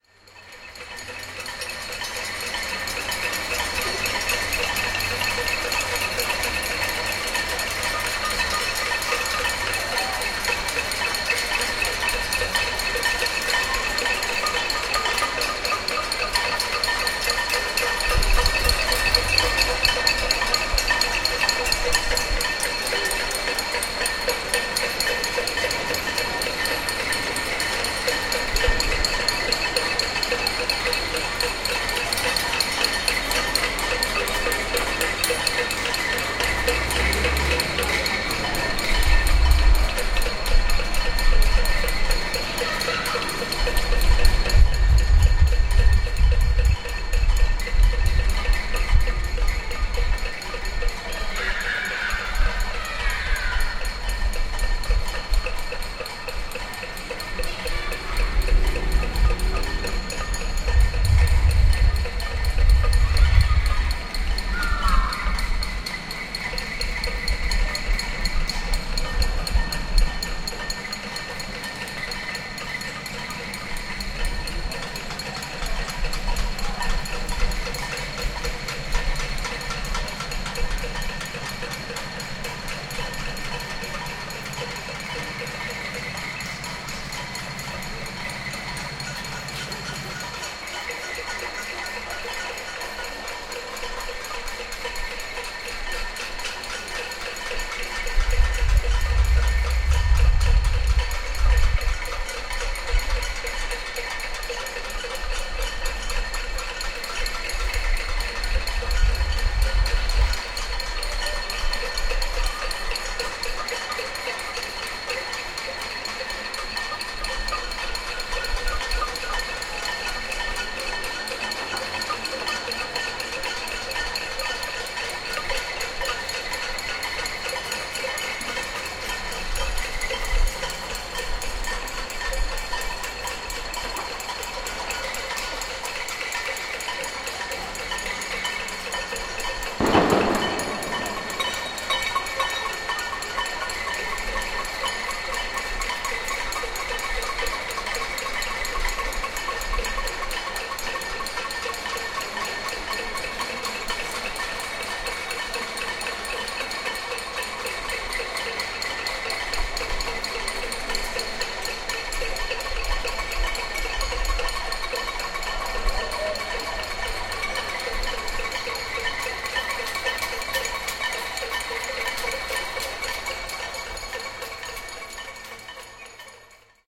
Binaural field-recording of neighbors banging pots and pans for independence elections. Everyday before 9N 2014 at 22:00. There is some undesired wind noise, so, not a high quality recording.
demostration, ambiance, barcelona, 9n, catalonia, binaural, soundman, okm-II, field-recording, city, zoom, h1, independence